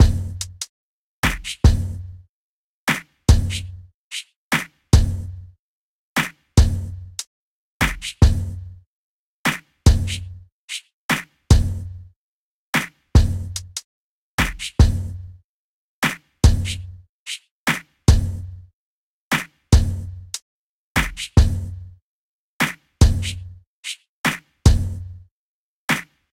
Hip Hop Drum Loop 16
Great for Hip Hop music producers.
hip, hop, loop, sample